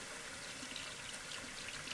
A faucet turned on with a full stream of water.
water, full-stream, facet